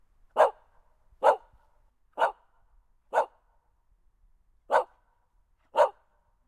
Barking Dog
Barking Shiba Inu
animal, bark, barking, Dog, small